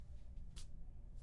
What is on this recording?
41. Mano en el hombro Hand on shoulder
hand resting in a shoulder.
Hand, resting, shoulder